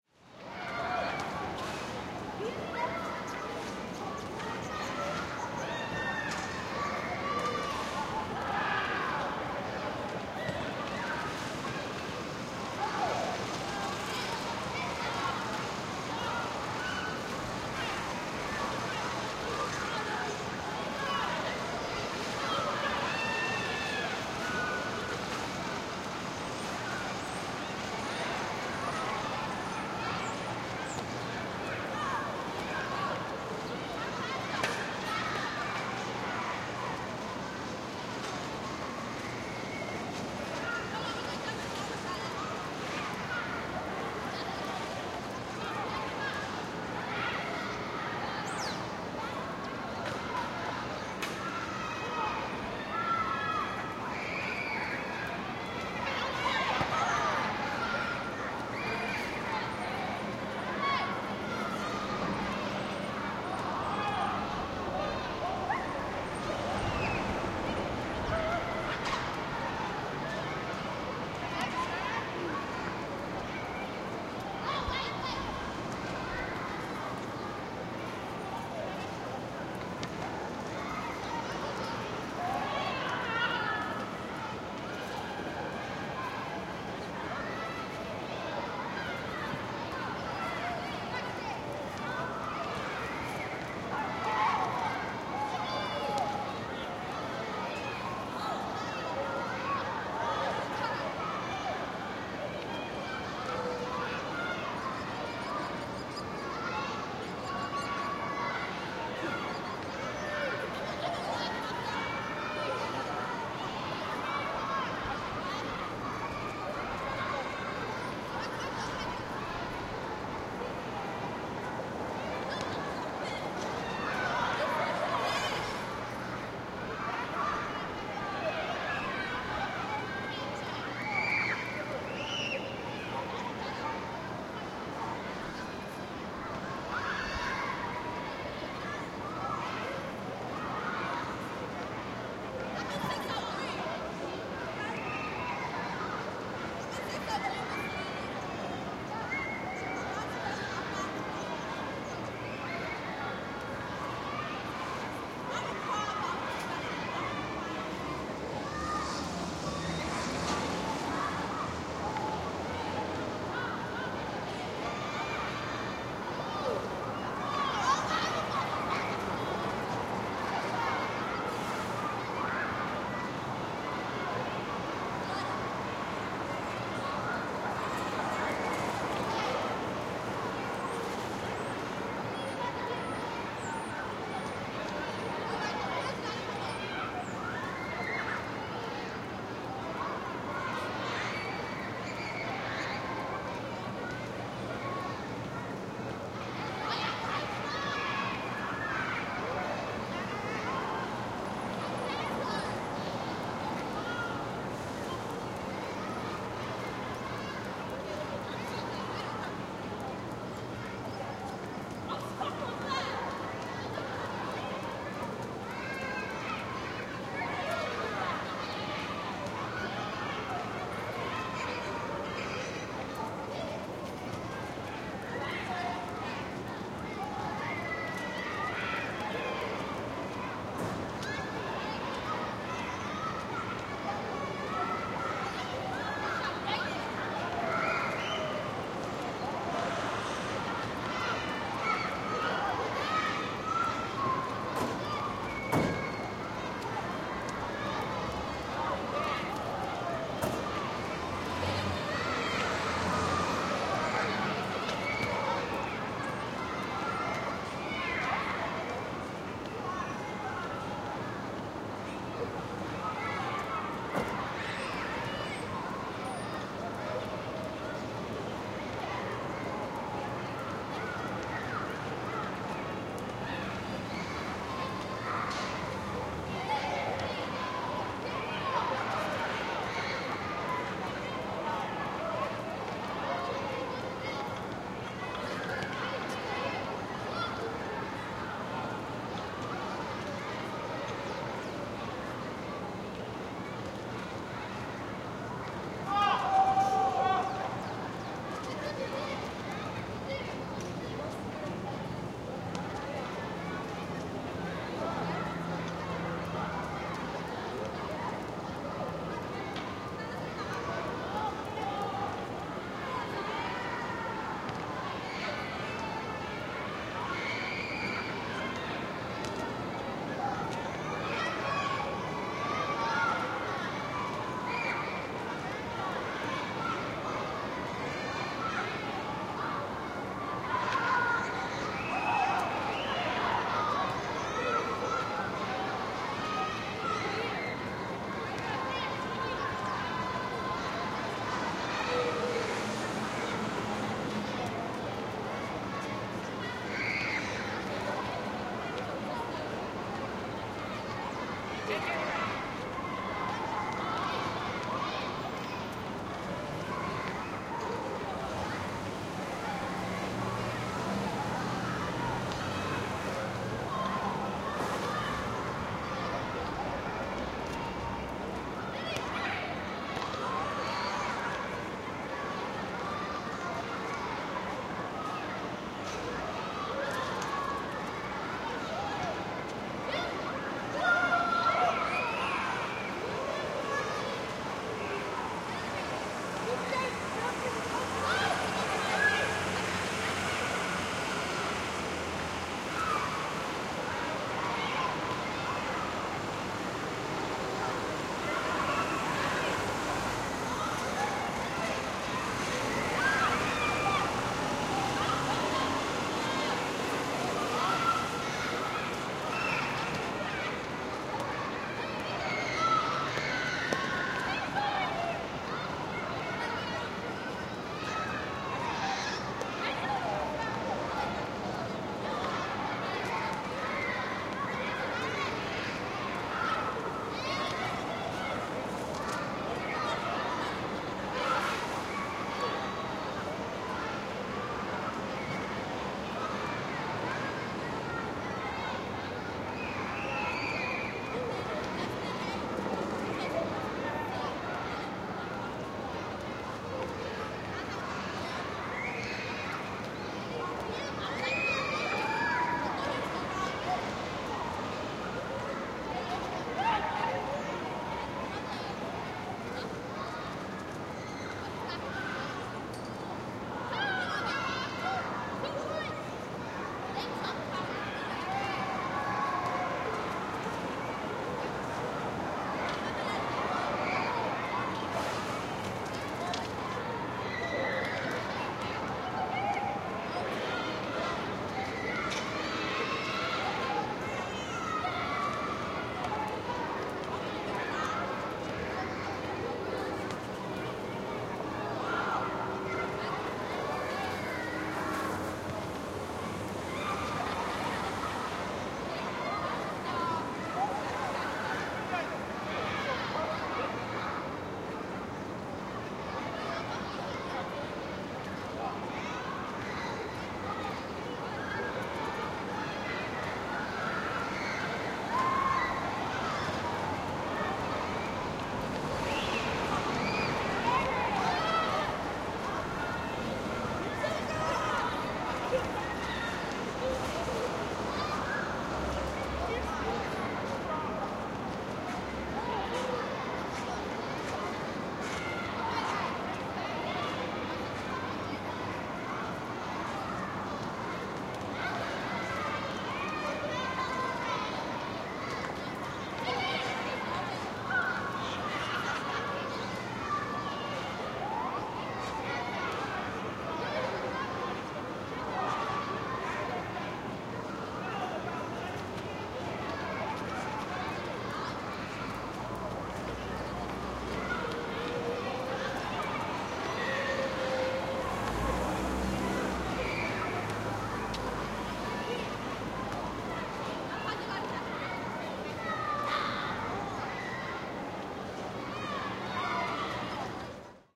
Field Recording Backyard New York
kids playing on school yard, street noise etc.
ambience, city, field-recording, kids, playing